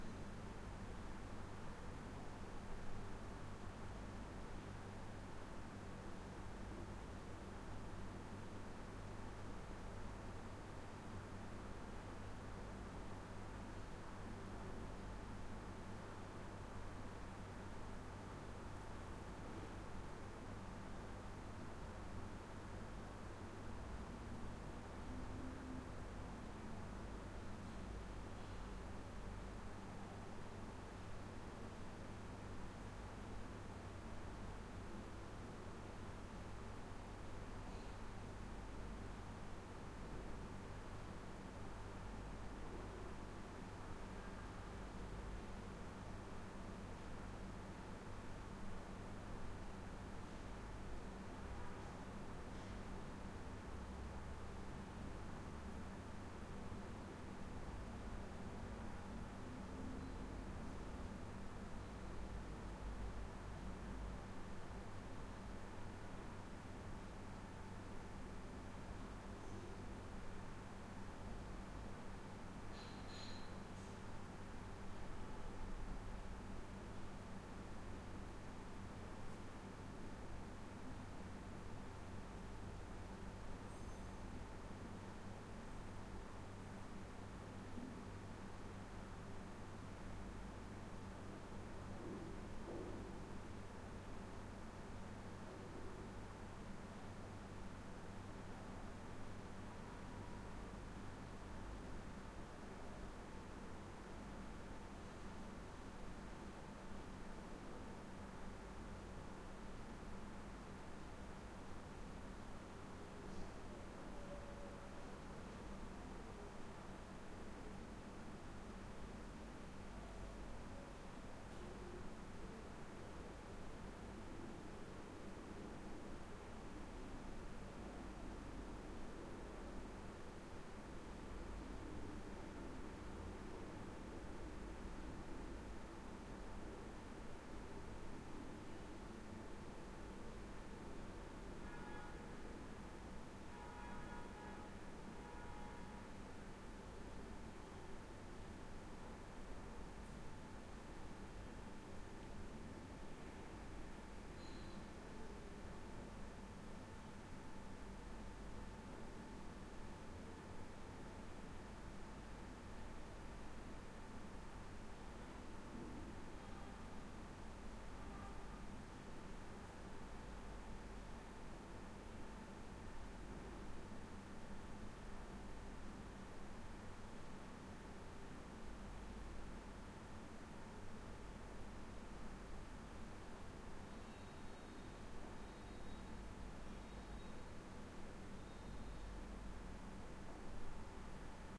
room tone hotel hallway quiet carpeted light ventilation distant voices and occasional elevator beeps and dish cutlery Gaza 2016
hallway, hotel, room, tone, quiet